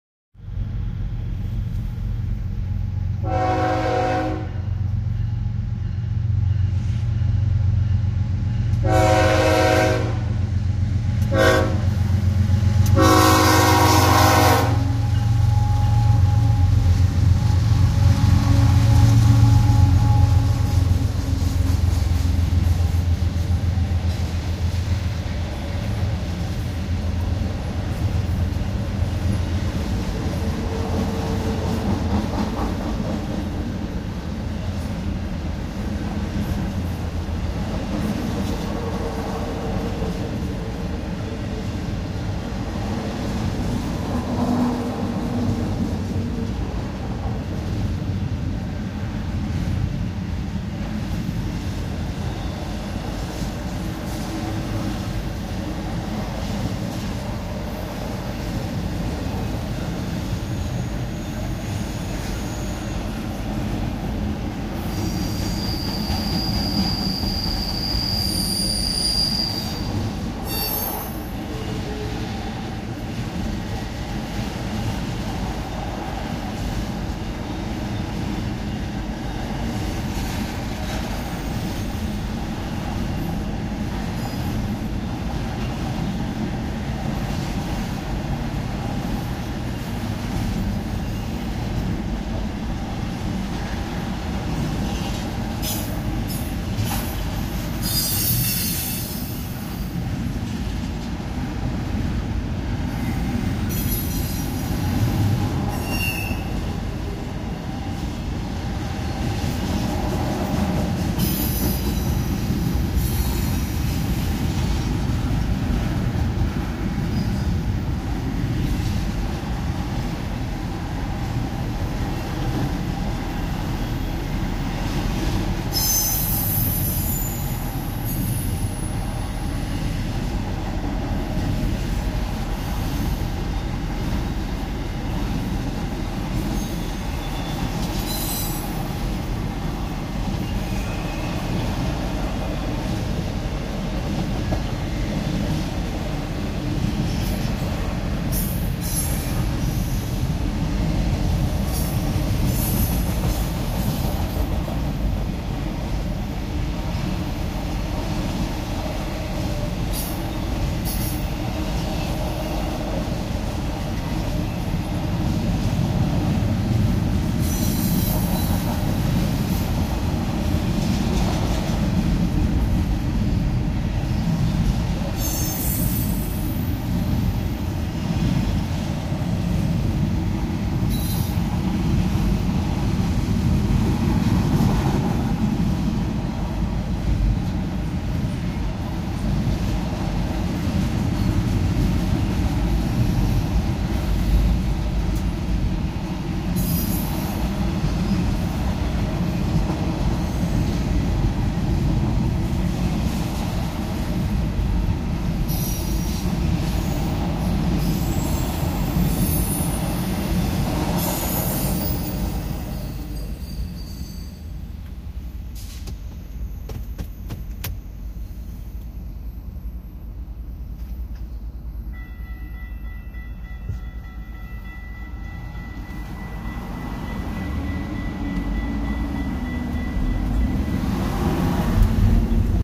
A freight train passing a railroad crossing on a local highway. Whistle and crossing gate sounds can be heard, too.